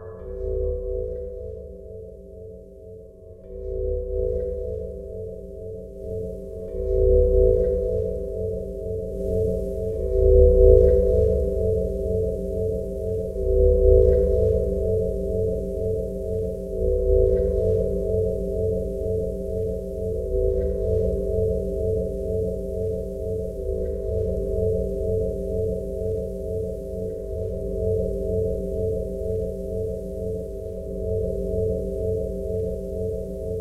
pad 001 fatatmo
deep pad sound
dub, echo, experimental, pad, reaktor, sounddesign